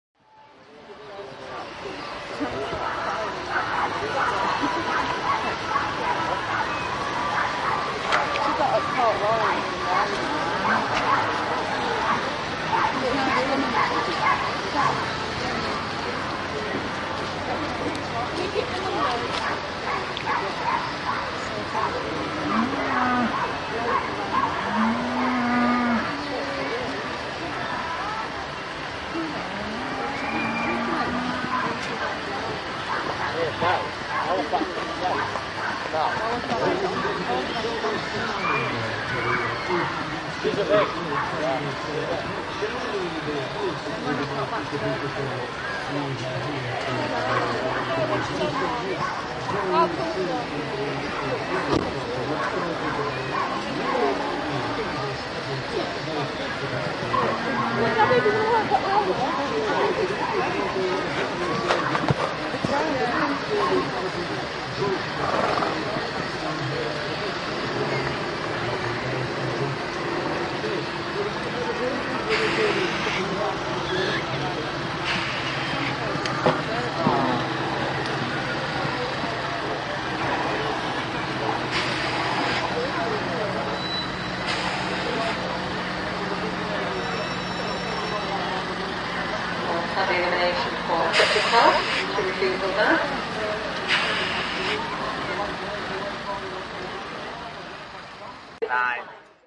horse trial ambience 1
Recorded at the East Yorkshire village of Eastrington at their 100th summer fayre on 20th June 2009. Held in the village field, there were a few hundred people attending. Around the perimeter were stalls, to one end were the farmers displaying animals and machinery and a horse jumping competition. At the other end was the fairground, a brass band and food tents.
show-jumping, england, fair, horse-riding, horse, countryside, summer, horses, gala, fayre, field-recording